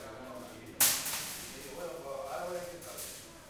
A girl with her children is removing a shopping cart of a row of these. The supermarket is a Carrefour in Barcelona.
carrefour, shoppingcart, metal, shopping, supermarket